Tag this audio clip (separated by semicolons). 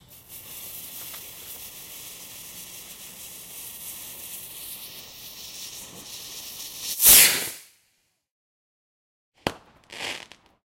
ignite; whoosh; pop; Bang; Fizz; rocket; Boom; fuze; Firework